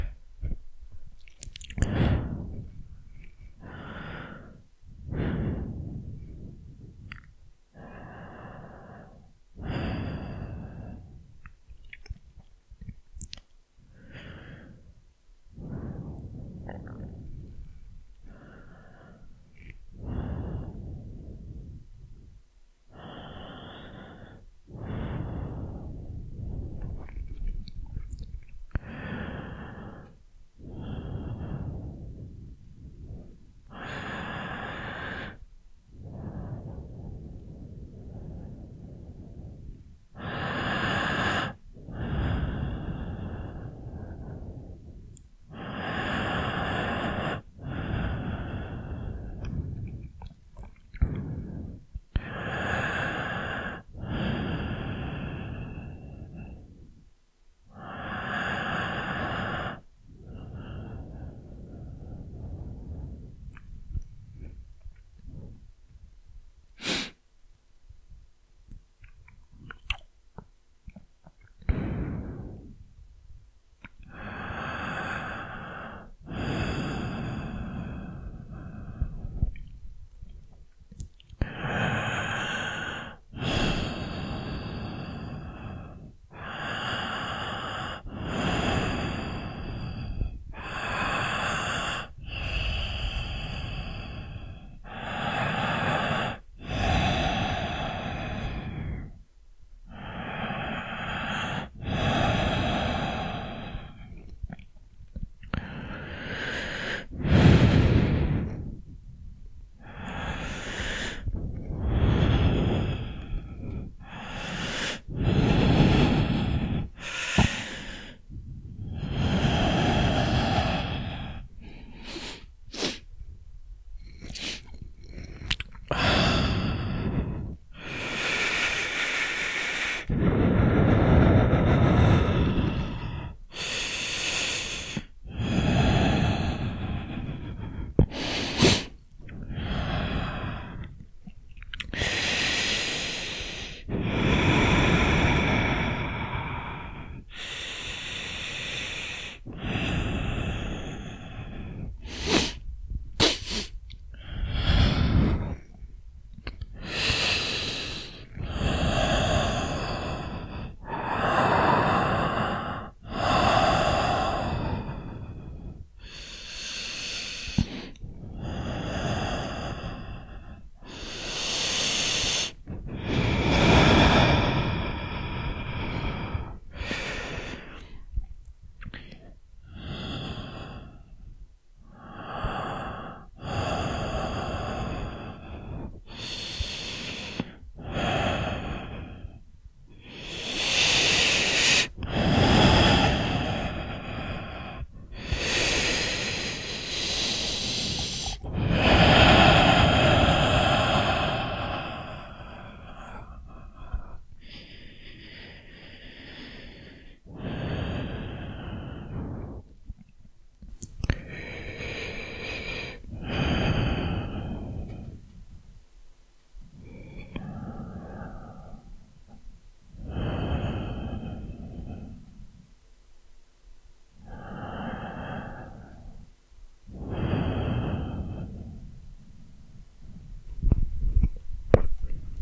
My breath and sniffs
without any edits
Mic: Shure C606
air, ards, asmr, breath, human, sniff, sniffs